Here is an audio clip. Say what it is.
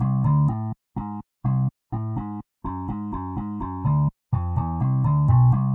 TIG New Wave 125 JBass B
From a song in an upcoming release for Noise Collector's net label. I put them together in FL. Hope these are helpful, especially the drum solo and breaks!
125bpm, new-wave, bass, track, acoustic, guitar, loop, realistic